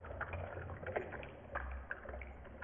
A potential sound for water being moved by rocks under water. Slowed downed version of running my hand through water.
rocks, Moving, Water